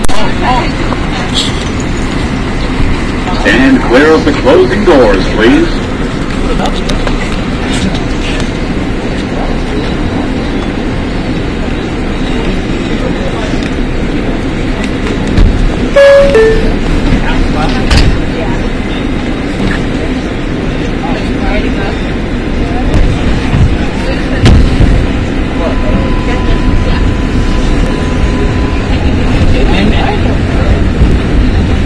stand clear of the closing doors please
A mediocre recording of the NYC subway, with the robot announcer saying "stand clear of the closing doors".